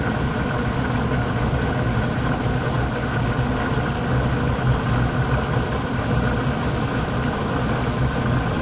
water fill
some familiar household laundry sounds. mono recording. concrete/basement room. washer filling with water (loop).